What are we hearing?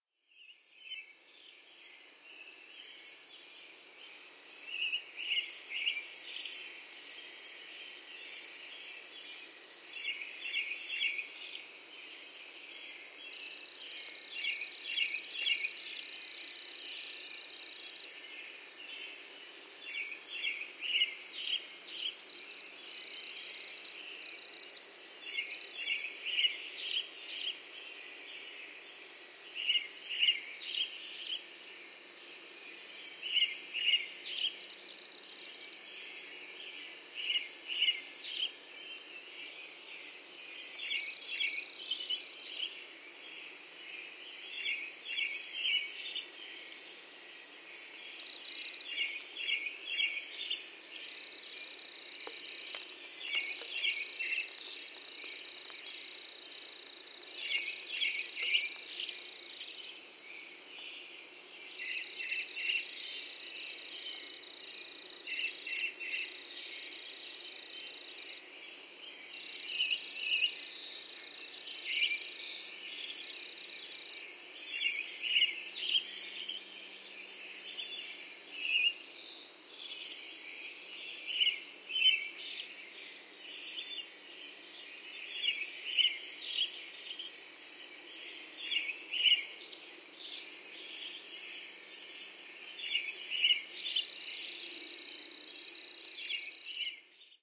Recorded in early April 2012 at about 5:00 A.M. in a rural area. I used the Handy Zoom H4N with the internal built-in microphones.
ambience, birds, crickets, early-morning, field-recording, forest, katydid, nature, nature-scape, peaceful, pleasant, serene, sound-scape, spring, woods